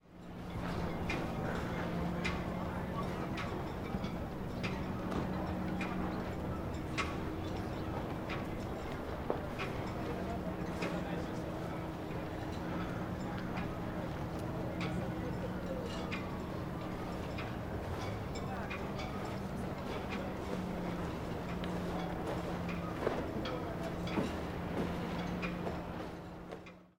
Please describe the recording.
Harbor Ambience 2
Ambient sound at a harbor - boats at the dock, waves lapping, sails/flags flapping.
Recorded with a Sennheiser 416 into a Sound Devices 702 Recorder. Used a bass rolloff to remove rumble. Processed and edited in ProTools 10.
Recorded at Burton Chace Park in Marina Del Rey, CA.
Harbor, Ocean, Ambience, Background, Ships, Transportation, Marina, Field-Recording, Boats